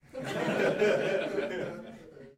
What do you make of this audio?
Recorded inside with a group of about 15 people.
adults, audience, chuckle, fun, funny, haha, laugh, laughing, laughter, live, theatre